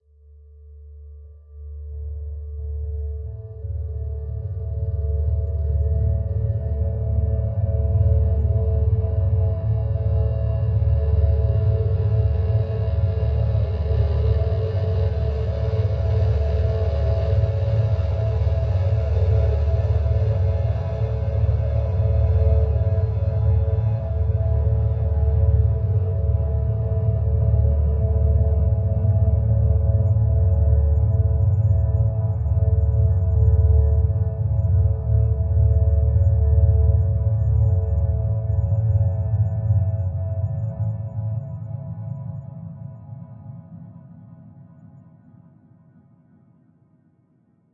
Hiding in the crystal forest from the capacitors.
ambient, atmosphere, dark, digital, glitch, sound-design